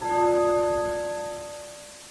town bell 1
Pillai Town - Bell
bell, pillai, town